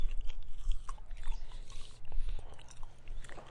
chewing chew sweets
chewing a fizzer with an open mouth
recorded with a zoom h6 stereo capsule
chewing, eating, OWI, sound, squish